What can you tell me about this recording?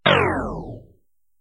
This sound presents reducing magic/defense... skills in RPG game.
This sound is created from Letter T said by Machac in EPOS text to speech engine by apply delay:
Delay level per echo: -1,0 dB
Delay time: 0,1
Pitch change effect: pitch/tempo
Pitch change per echo: -1,06%
Number of echoes: 30;
and Pitch speed -50% in Audacity.
attack, computer, decrase, defense, drain, game, game-sound, health, magic, reduction, rpg-game